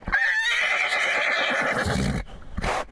Horse Neigh 02
This is an up-close recording of a horse whinny.
Whinny, Horse